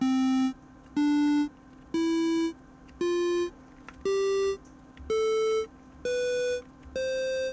Square Scale
Classic 8 bit game sound sscu